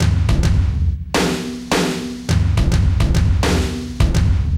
105 Necropolis Drums 05
free, dark, loop, grunge, halloween, necropolis, drums